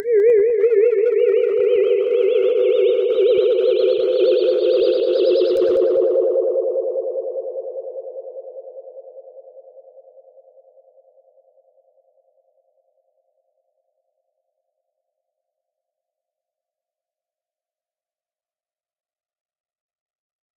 space ship echo noise
ship, noise, aliens, mus152, space